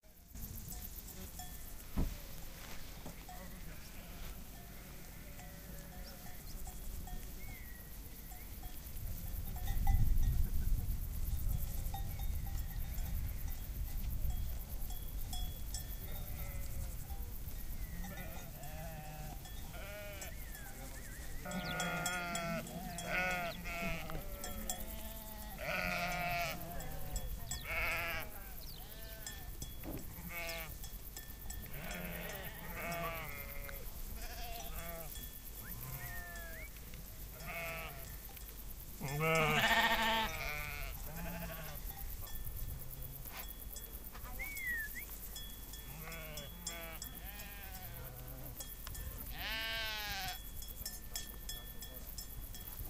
sound from mountain meadow, August 2013